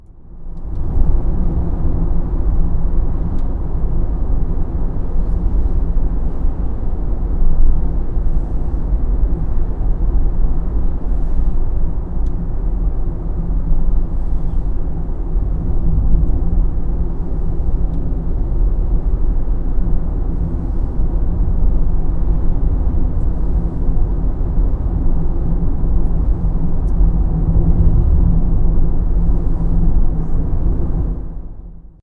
Driving at a speed of 80 kmph in a Citroën Berlingo Multispace 1.4i with a recording Edirol R-09 on the seat next to me, the driver.
engine, field-recording, street-noise, traffic